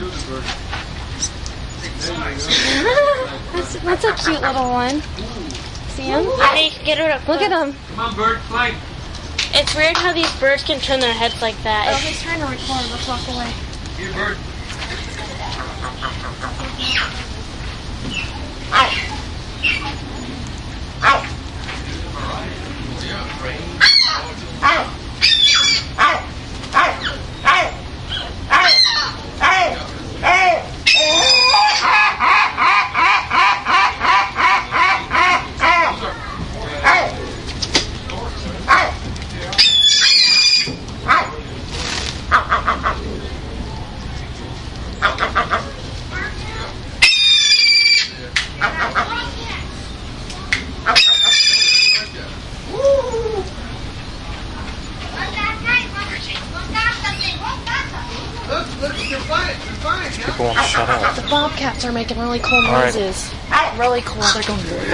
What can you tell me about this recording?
Angering the avians recorded at Busch Wildlife Sanctuary with Olympus DS-40.